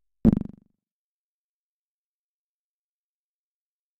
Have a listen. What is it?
Tonic Low Frequency Short Sound FX
This is a short low frequency sound effect sample. It was created using the electronic VST instrument Micro Tonic from Sonic Charge. Ideal for constructing electronic drumloops...
drum, electronic